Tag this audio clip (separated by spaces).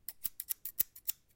close field-recording movement scissors